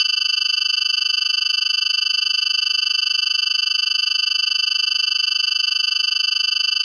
Have you seen films like Terminator, played games like StarCraft Broodwar and seen TV series like X files and 24 then you know what this is.
This sound is meant to be used when text is printed on screen for instance to show date / time, location etcetera.
Part 9 of 10